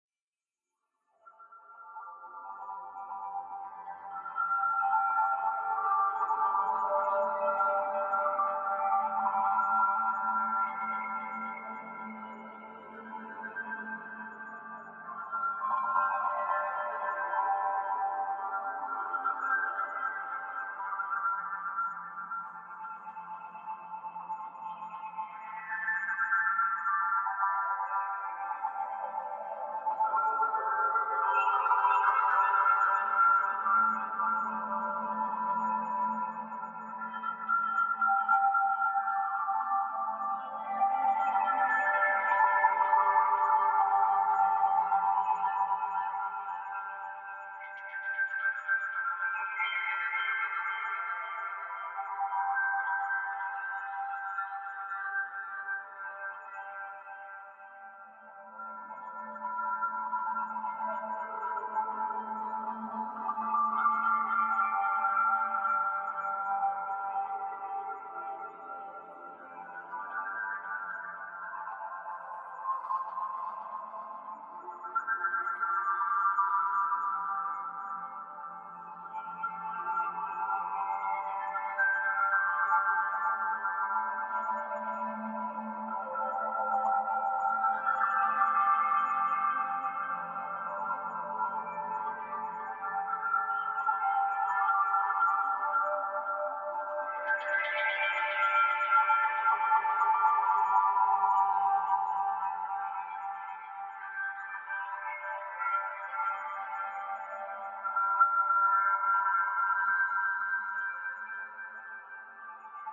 Fmaj-Rain3pad
Pad, created for my album "Life in the Troposphere".